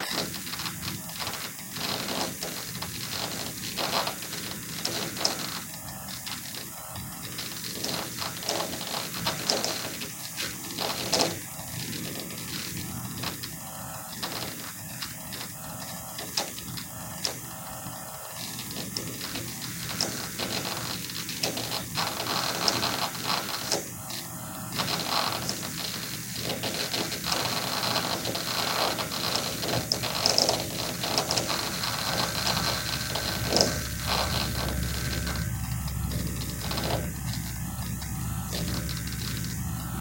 Recording computer harddisk with noises from computer fan. Microphone: Behringer ECM8000 -> Preamp: RME OctaMic -> RME QS
DeNoised with Izotope DeNoiser & Audacity
computer, harddisk, mechanical